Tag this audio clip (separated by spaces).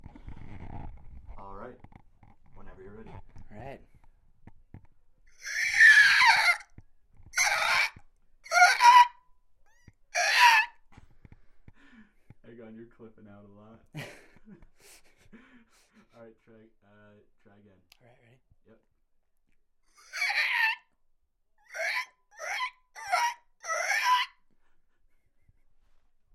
alien dinosaur raptor